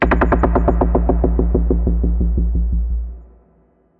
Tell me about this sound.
BS Bass Stab 1 A#2
Nice bass stab with FX (120 BPM)
4x4-Records, Bass, Loop